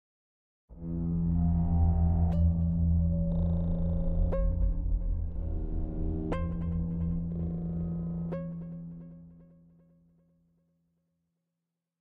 Low Bassy Ambiance
Edited, Mastered